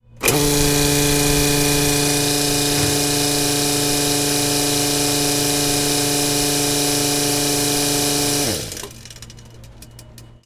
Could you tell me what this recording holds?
Recording of an air fed paper folder.
field-recording, folder, industrial, machine